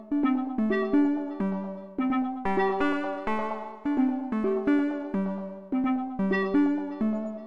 MIDI/OSC lines generated with Pure-Data and then rendered it in Muse-sequencer using Deicsonze and ZynAddSubFX synths.
new, electronika, music, electro, ambient, bpm-128